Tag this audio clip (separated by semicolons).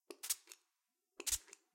spray; air; hair-product